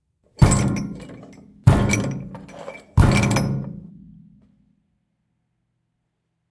For the 2021 production of Christmas Carol I created an enhance version of Marley knocking. The knock is enhanced with a piano note and a chain.